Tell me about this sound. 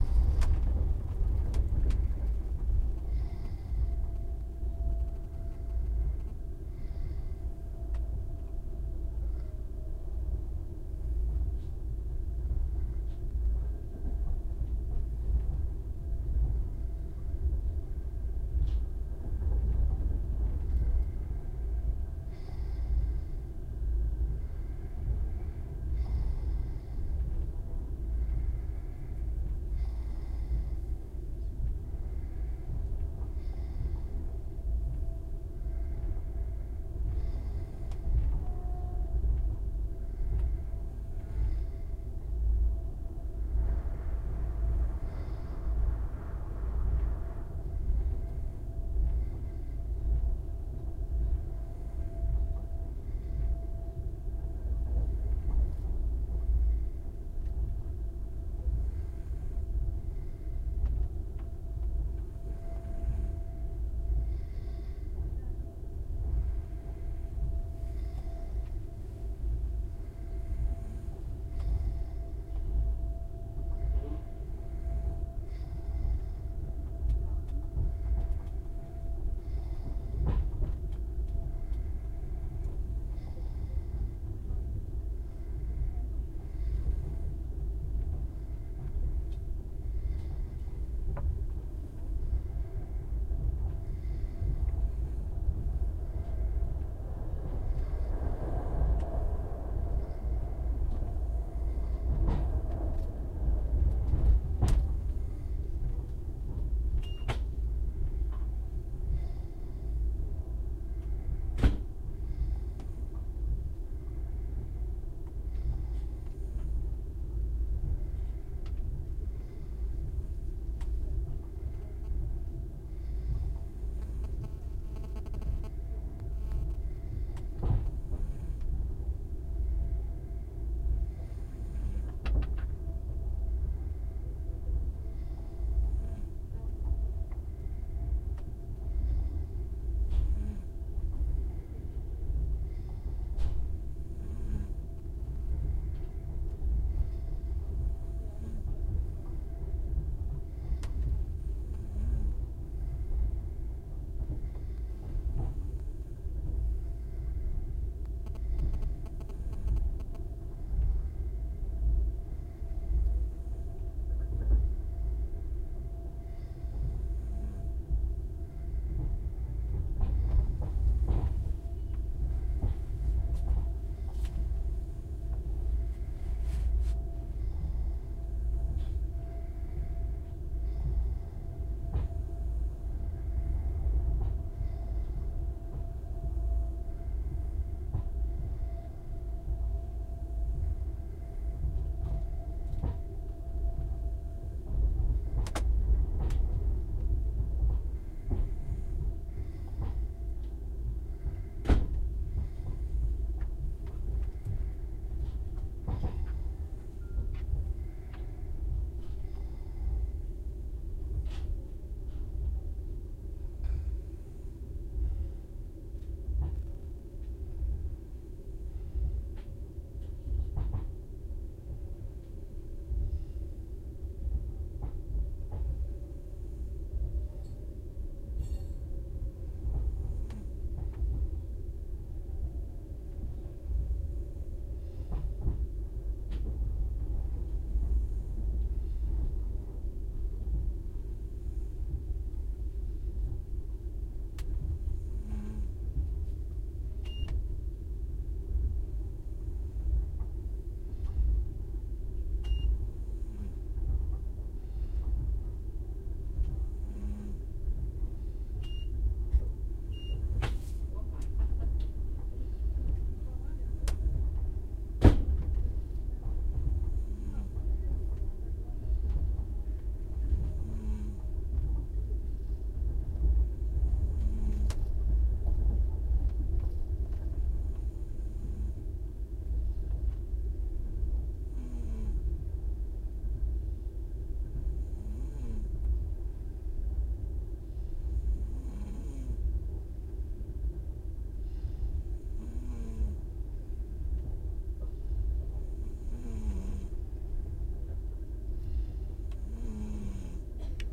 Passengers sleeping in night train. Moscow - St.Petersburg
In cabin. People sleep in the night train. The train makes a high humming sound which sounds like a melody. Recorded with Tascam DR-40.
cabin, field-recording, hum, sleeping, snoring, train, trans-siberian